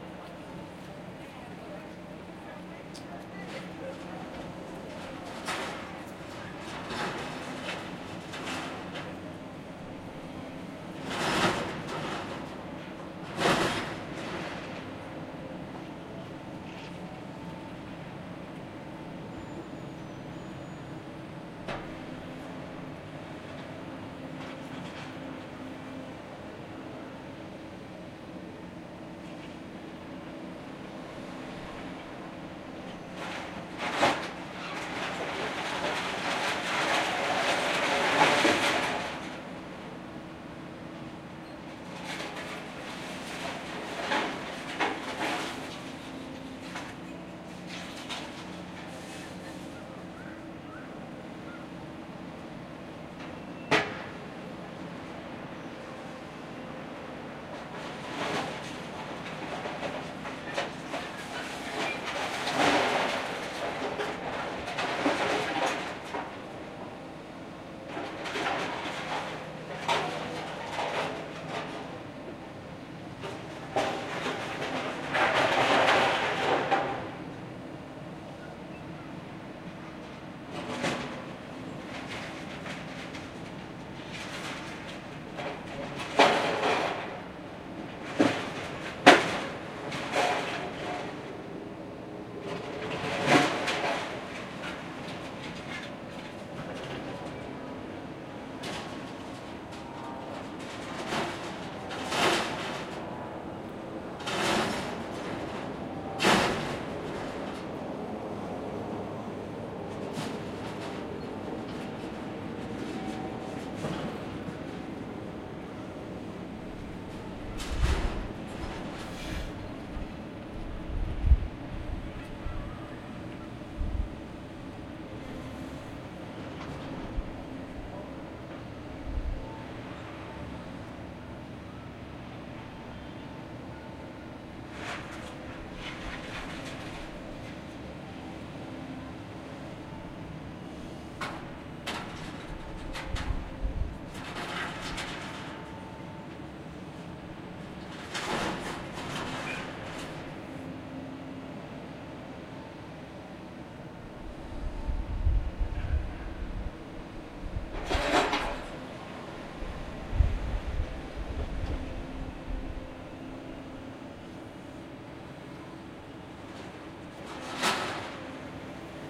Several huge excavators working on demolition. Big metal scraps dropped in containers. Some ambience from passing people, kids on playground nearby and airplane passing.
trash-container, metal, noise, excavators, human-ambience, volvo, field-recording, industrial, demolition